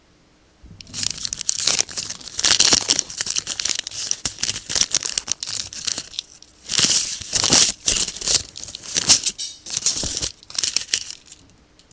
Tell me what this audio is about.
Recorded CandyWrapper04
Ruffling a candy wrapper.
wrapper, candy